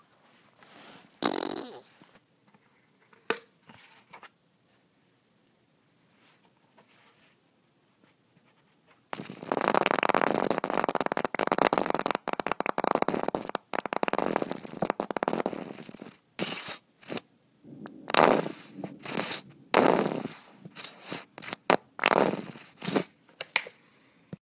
Just a basic squeezing of a mustard bottle in order to hear the majestic squirting/farting noise that middle schoolers giggle over until the world ends.